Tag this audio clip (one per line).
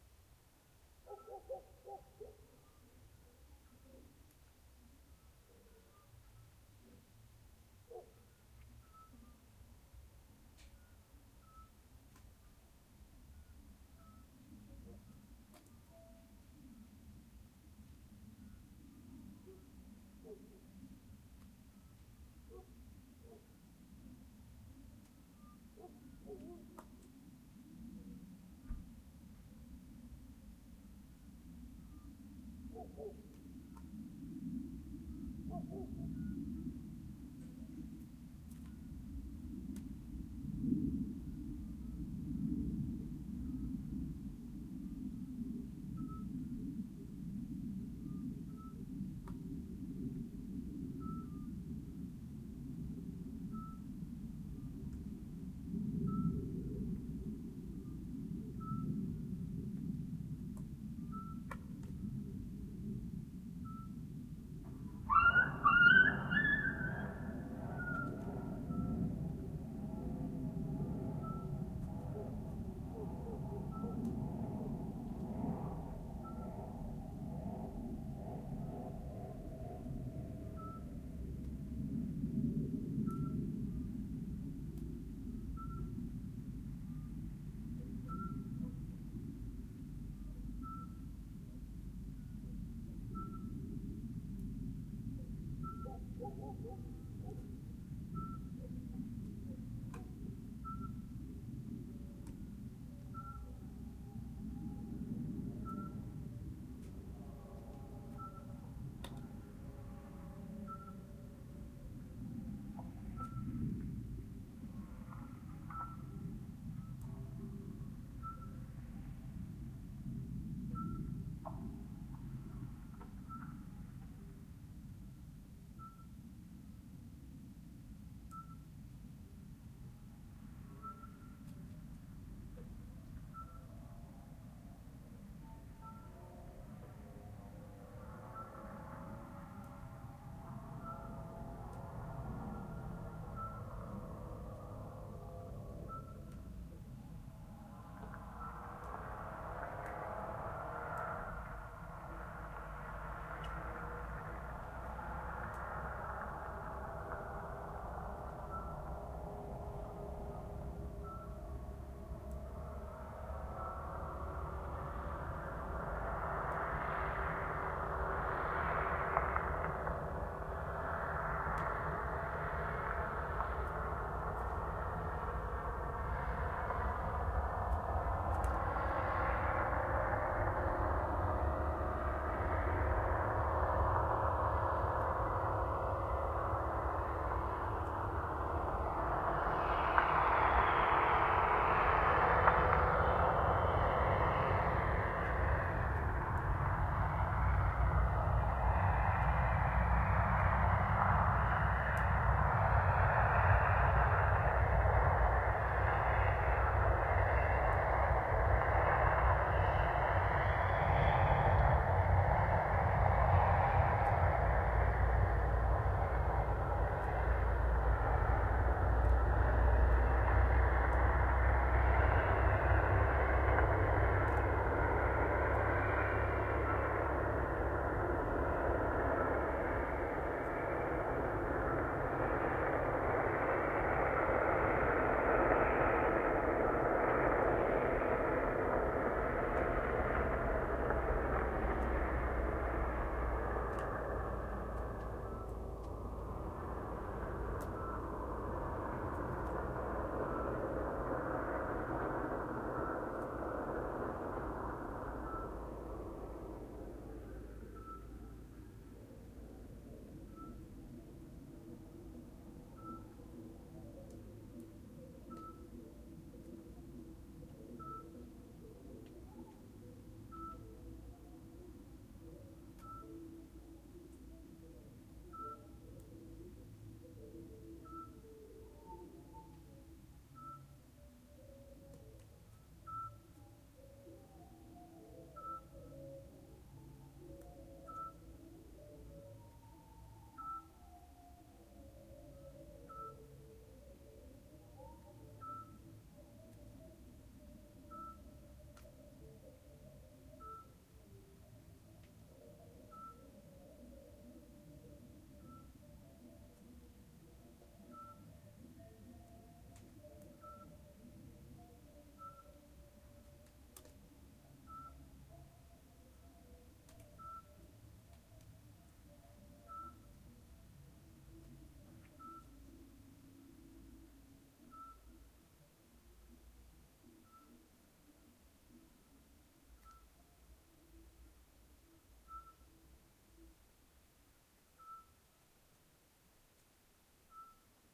silence
spring
ambiance
nature
night
owl
yelp
south-spain
field-recording
bark